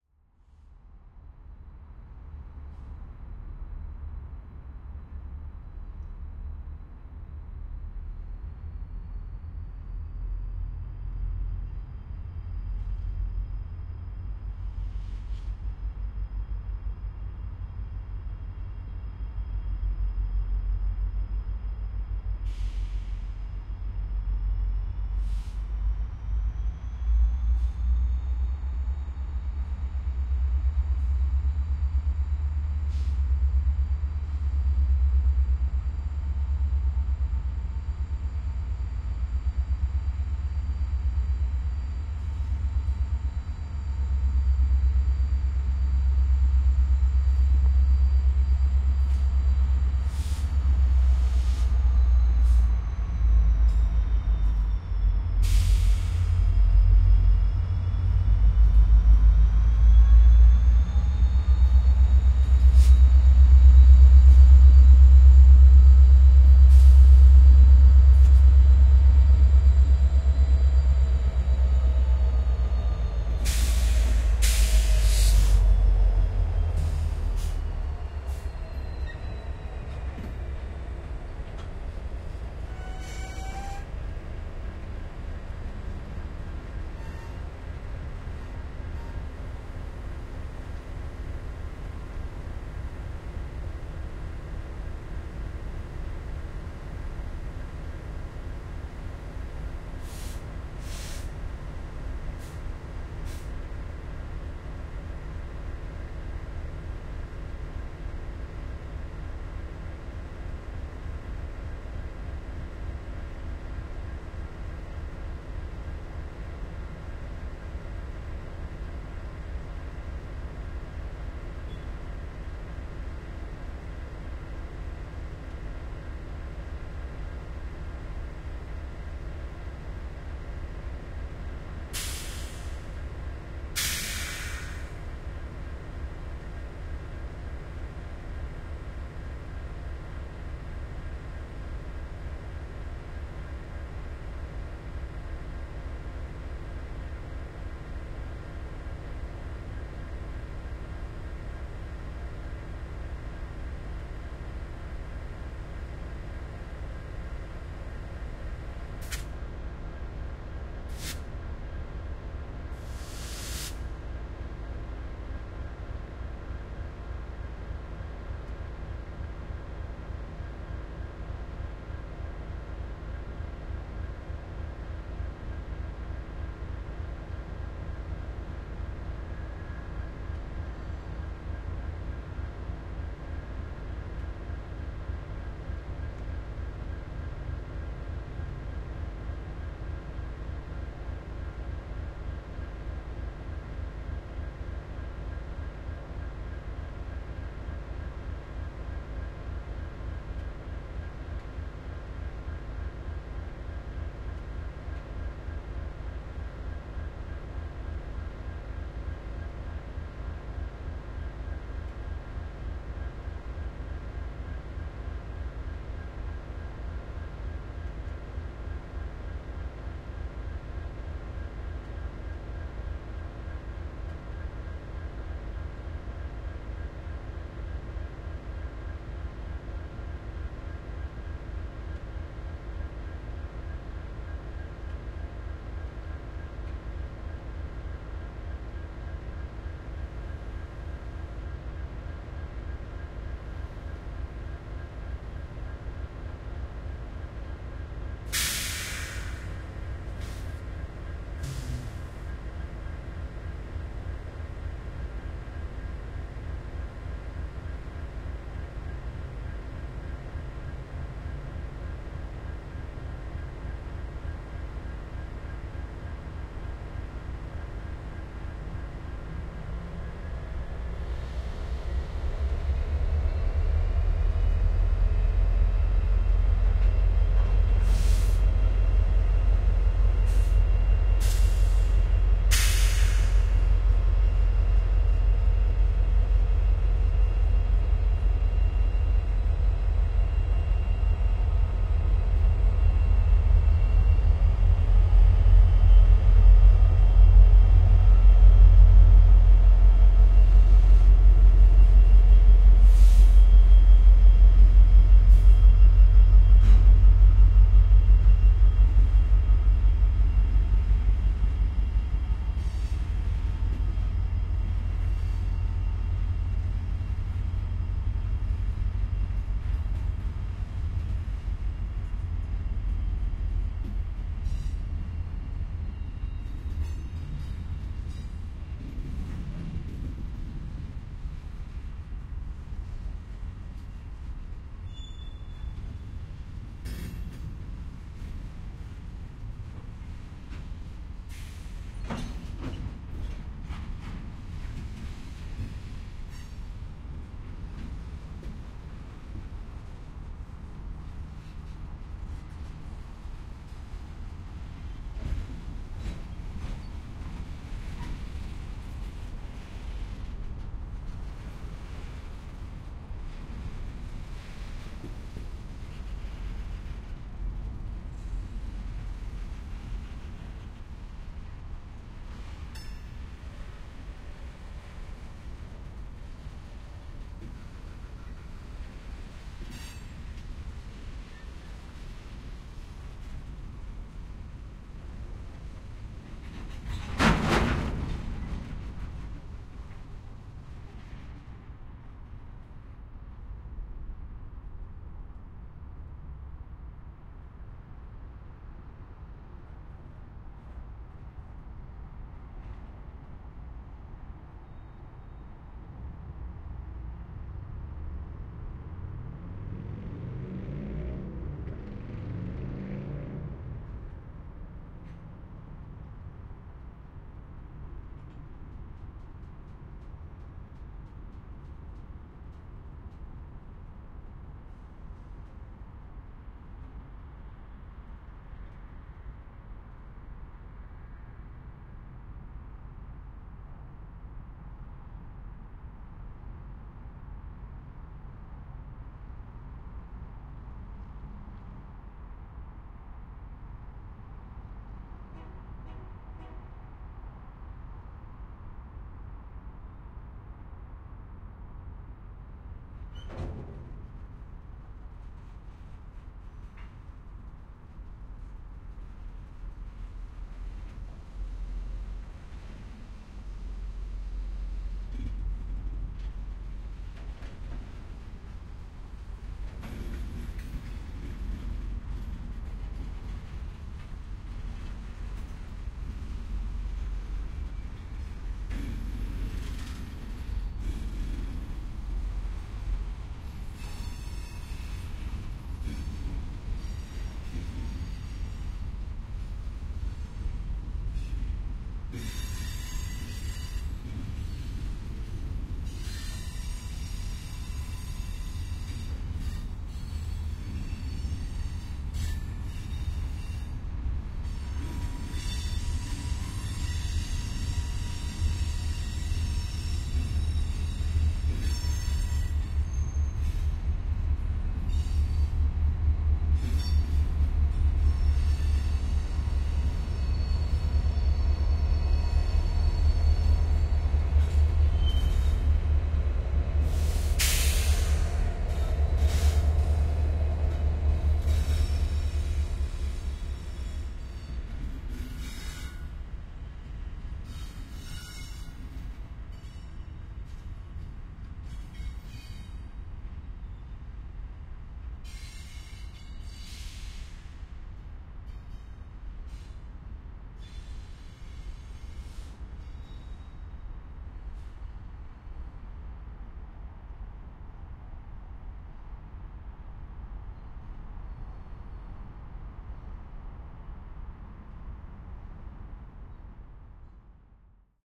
2013-08-30 forward reverse train 01
At the end of a train yard, a locomotive pulls up directly in front of my location and stops for a while. Then it pulls forward with a bunch of cars attached. Then it reverses back into the yard eventually.
Recorded with a stereo pair of Sennheiser MKH 8020 mics into a modified Marantz PMD661.
bang, city, engine, field-recording, geotagged, locomotive, loud, metal, metallic, motor, noisy, oregon, portland, rail, railroad, scrape, squeak, train, transportation, urban